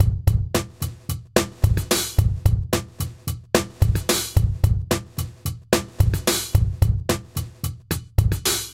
destiny drums 100bpm
Four bar funk loop, natural drums. Created in Reason. MISLABELLED: actually 110bpm.
loop, funk, snappy, drum